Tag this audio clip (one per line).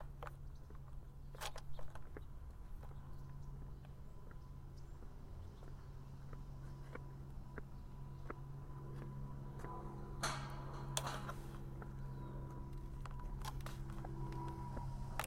ambience
city
night